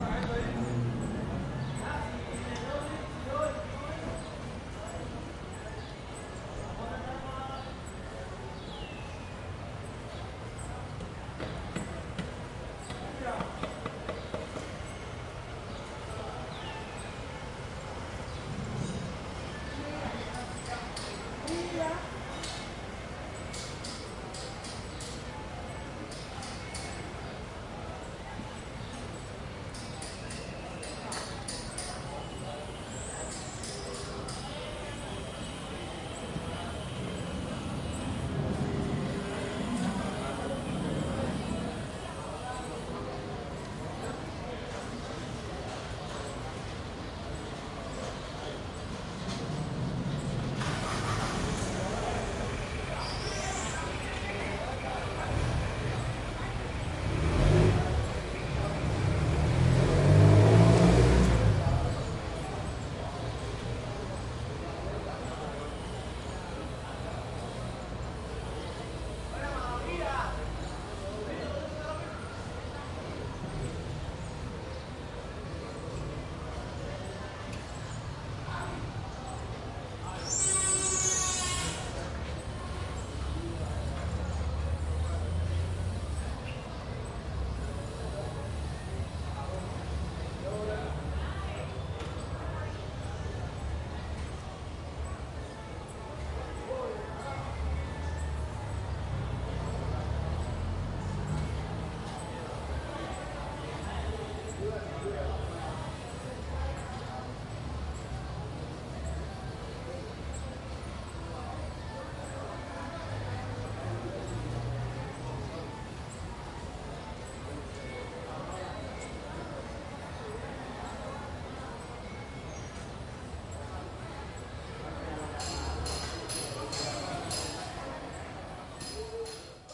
Street Noise in Centro Habana
The sound of the street from a fourth floor window on Amistad St in Centro Habana.
Recorded with a Zoom H2N